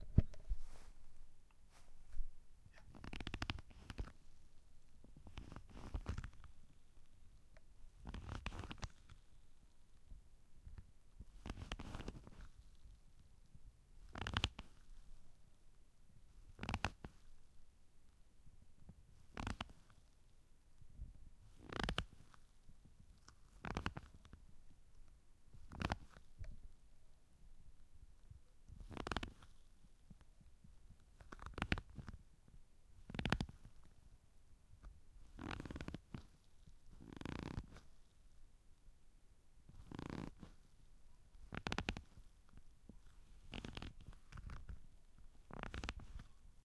Lerenstoel kraakt
Creaking leather, multiple creaks. Made with a leather handbag, but used as foley for a creaking leather chesterfield chair.
creak, creaking, leather